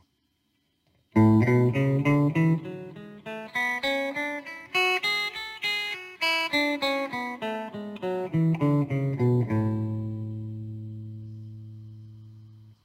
A major scale played on a Yamaha Pacifica, run through a Marshall MG100DFX.